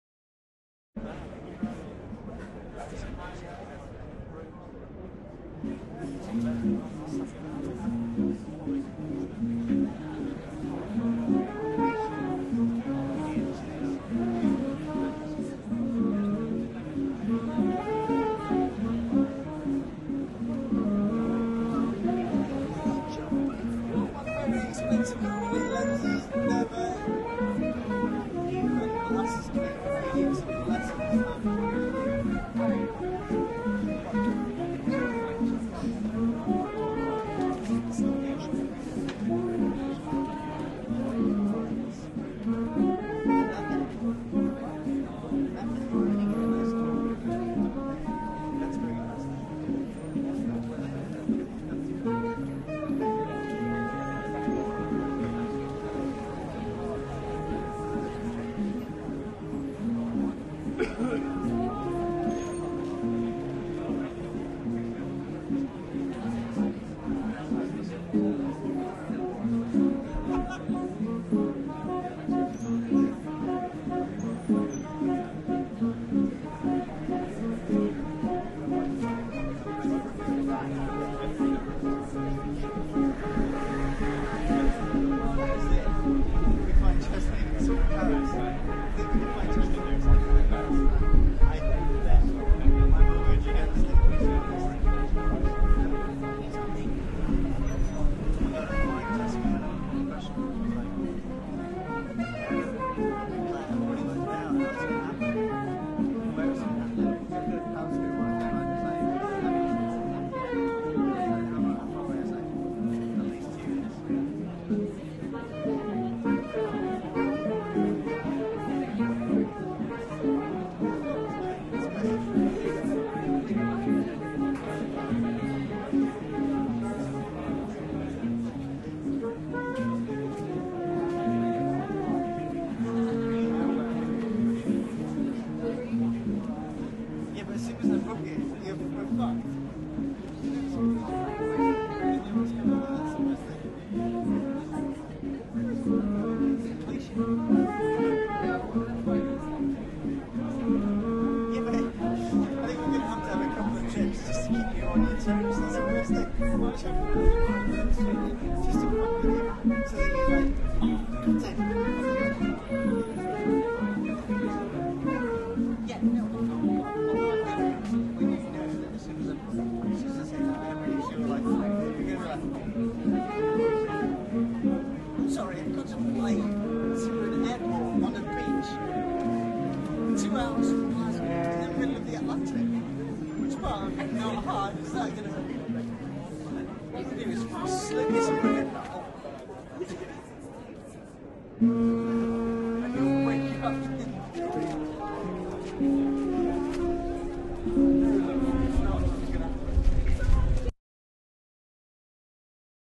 Sitting on an outdoor Paris cafe terrace some buskers started up across the road. The wind cooperated and I got this nice blend of chatting cafe sounds, passers by walking on the sidewalk, vehicles and a little of the popular Desmond/Brubek number 'Take Five' in the background. A very pleasant beer that was.